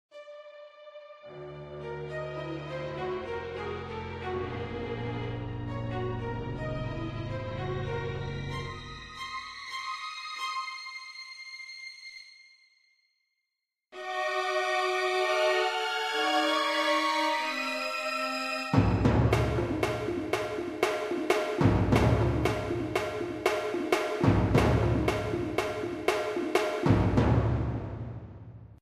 I went to a horror film festival and mostly got the music stuck in my head. This was one attempt to work it out. Not majorly successful but maybe useful to someone. As ever, made with Edirol Orchestral on Cubase.